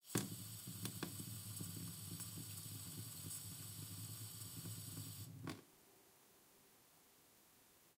Kitchen Sink Tap Water Opening And Closing Very Soft
Recording of a running water tap in my kitchen.
Processing: Gain-staging and soft high and low frequency filtering. No EQ boost or cuts anywhere else.
Drip, Dripping, Effect, Kitchen, Liquid, Noise, Pouring, Running, Sink, Splash, Tap, Water, Wet